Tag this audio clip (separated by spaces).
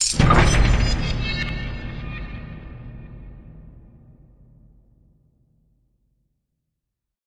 thrill pitch metal sound shift stinger slam